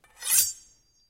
metal-blade-friction-4
metal metallic blade friction slide
metallic, friction, slide, blade